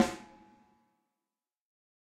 KBSD2 E22 VELOCITY4
This sample pack contains 63 stereo samples of a Ludwig Accent Combo 14x6 snare drum played by drummer Kent Breckner and recorded with a choice of seven different microphones in nine velocity layers plus a subtle spacious reverb to add depth. The microphones used were a a Josephson e22s, a Josephson C42, an Electrovoice ND868, an Audix D6, a Beyer Dynamic M69, an Audio Technica ATM-250 and an Audio Technica Pro37R. Placement of mic varied according to sensitivity and polar pattern. Preamps used were NPNG and Millennia Media and all sources were recorded directly to Pro Tools through Frontier Design Group and Digidesign converters. Final editing and processing was carried out in Cool Edit Pro. This sample pack is intended for use with software such as Drumagog or Sound Replacer.
14x6, audix, beyer, breckner, combo, drum, dynamic, electrovoice, josephson, kent, layer, layers, ludwig, mic, microphone, microphones, mics, multi, reverb, sample, samples, snare, stereo, technica, velocity